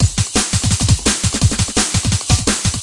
Drum'if ! 1
A complex breakbeat for junglist
based, beat, dnb, drum, reggae-jungle, heavy, bass, speed, break, amen, fast